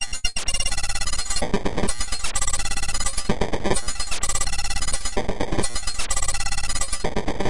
8 seconds of my own beats processed through the excellent LiveCut plug-in by smatelectronix ! Average BPM = 130
livecut, beat, cymbal, metal, glitch, loop, idm, processed, bell